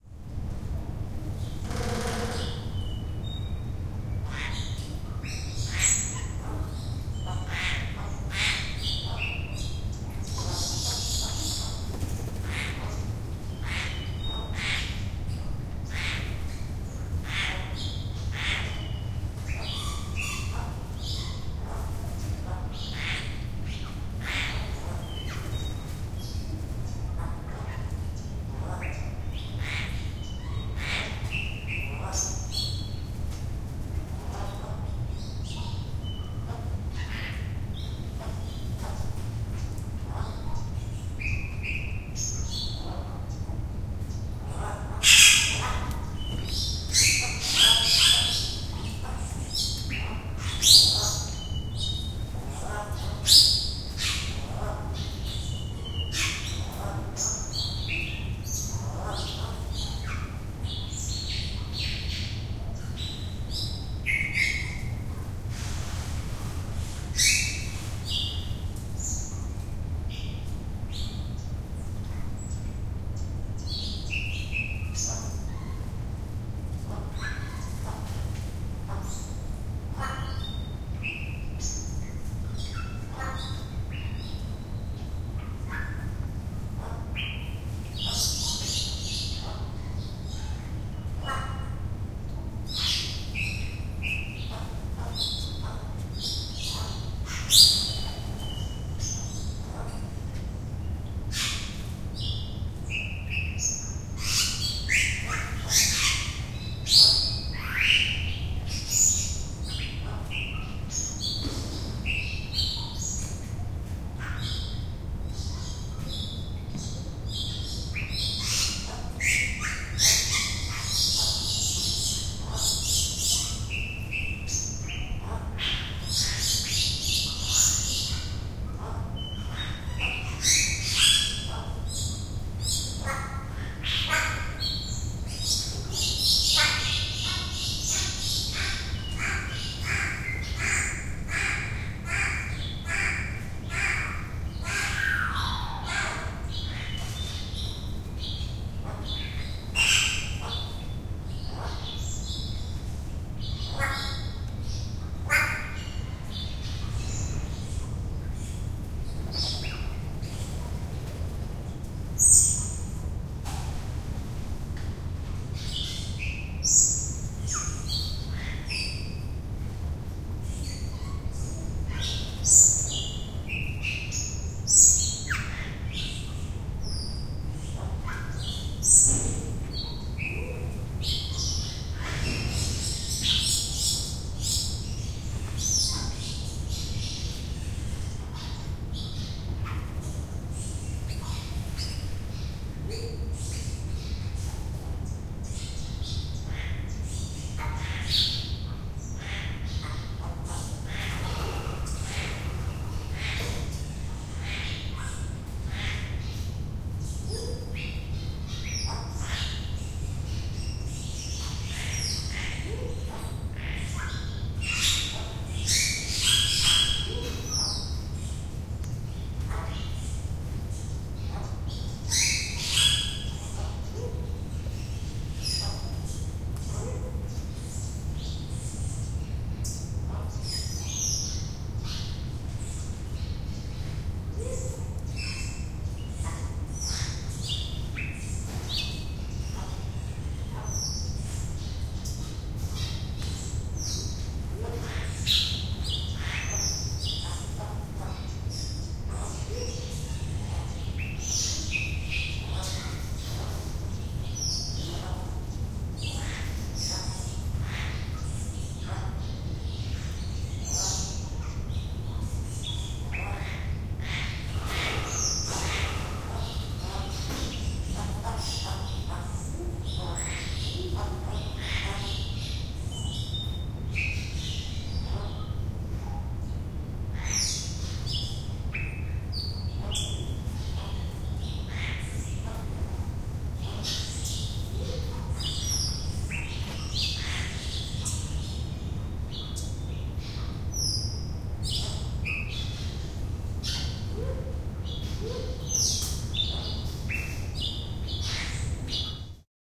Recorded at the Houston Zoo. This is an indoor aviary with lots of birds calling and flying around, including Bali Starlings and a woodpecker drumming at the beginning.